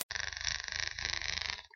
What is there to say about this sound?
Preadator Clicking 2
The second version of the Predator Clicking sounds.
Movie, Creature, Creepy, Man-Made